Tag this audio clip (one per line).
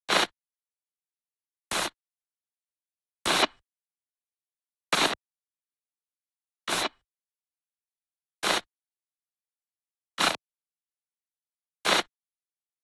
channel
static
change
tv
blips